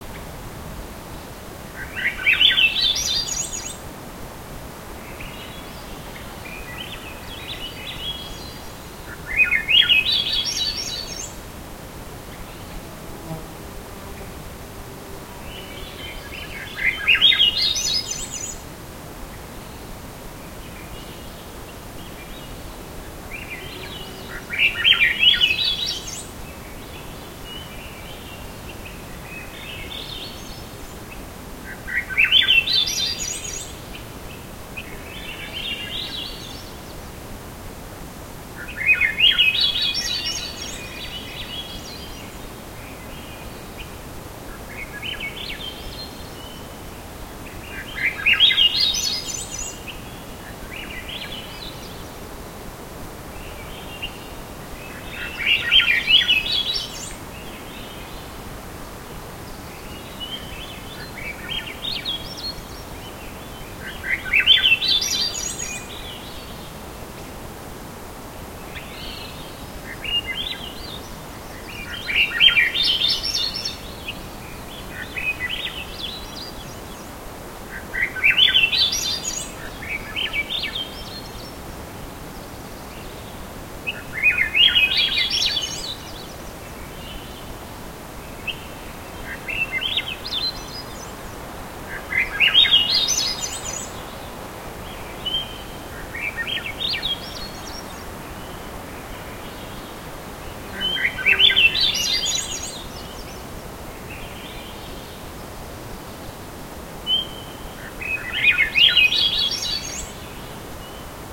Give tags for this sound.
bird birds chirping field-recording forest hike nature

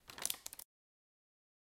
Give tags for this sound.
Ice Crack Short Footstep

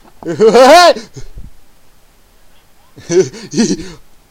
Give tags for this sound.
laugh laughable laughing

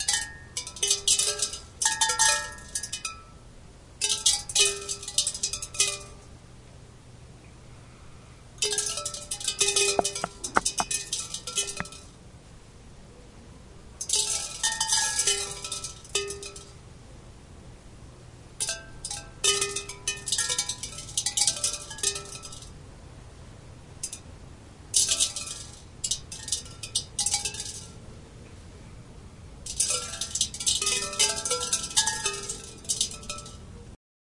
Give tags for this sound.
percussion steel-drum

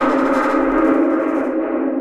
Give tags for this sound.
60-bpm deep loop dubspace

